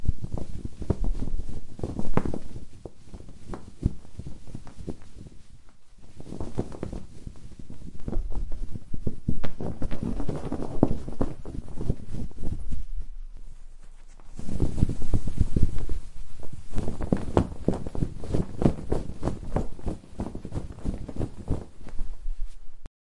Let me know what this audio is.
A flapping sound perfect for sails or flags in the wind. Recorded by flapping a rug out of the window.
Zoom H4. No processes applied.
boat, cloth, fabric, flag, flap, sail, wind